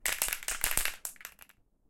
Spray Can Shake 3
Various shaking and rattling noises of different lengths and speeds from a can of spray paint (which, for the record, is bright green). Pixel 6 internal mics and Voice Record Pro > Adobe Audition.
aerosol, art, can, foley, graffiti, metal, paint, plastic, rattle, shake, spray, spraycan, spray-paint, spraypaint, street-art, tag, tagging